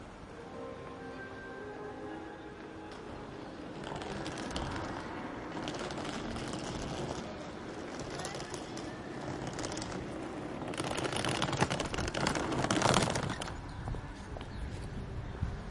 Exterior near Rouen Station, footsteps and rolling suitcase, shoeps stereo